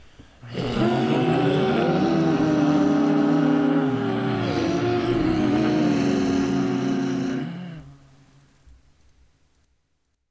Zombie Choir in Rehearsal
dead, spooky, monster, devil, ghost, evil, halloween, horror, scary